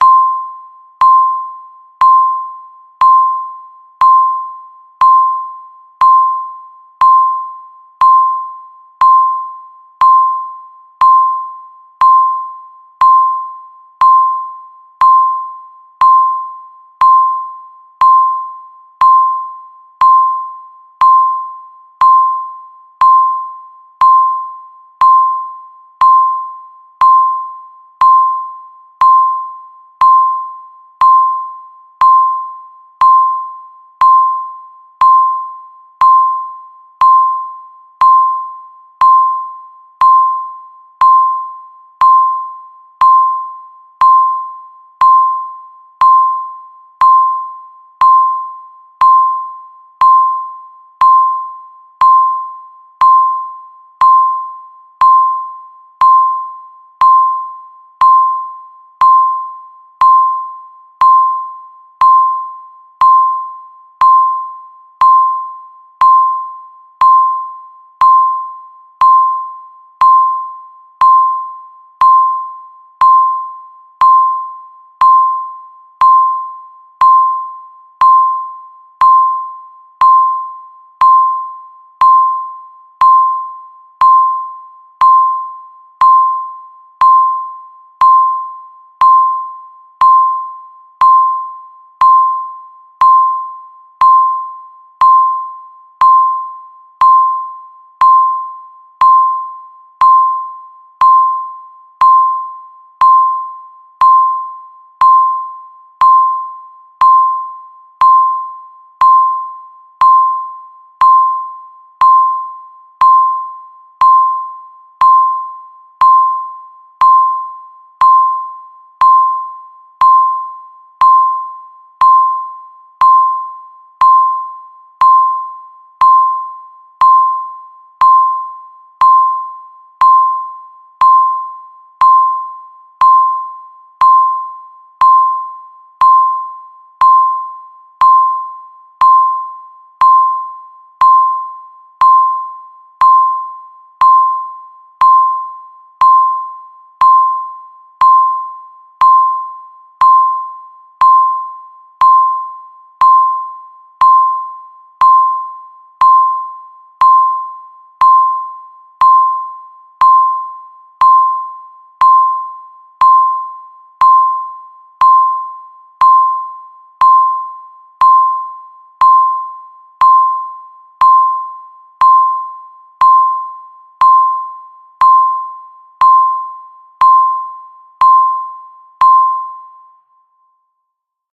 3min bell sound count
it is same repeatdly sound when 3min
bells, long, countdown, count, 3min